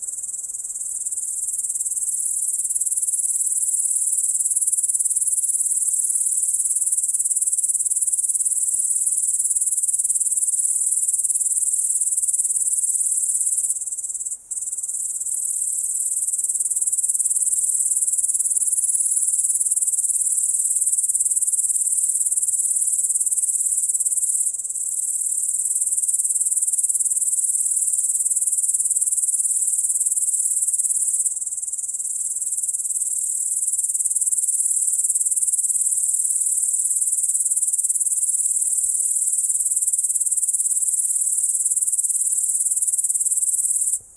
Świerszcze nagrane w Gąskach w okolicy latarni morskiej w lipcu 2018 roku
bug, crickets, field-recording, grasshopper, nature, night, summer